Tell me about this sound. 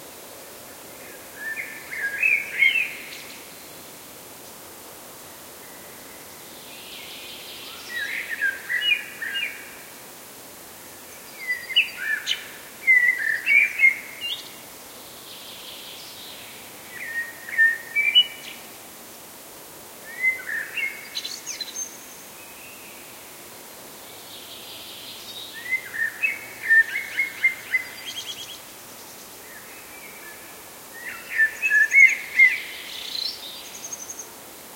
birds singing in a silent forest